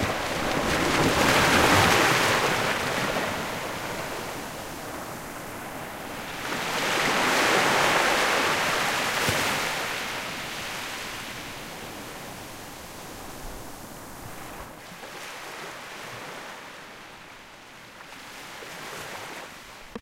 Audio captured on the island of Superagui, coast of the state of Paraná, southern region of Brazil, in March 17, 2018 at night, with Zoom H6 recorder.
Small waves. Light wind.
sea, seaside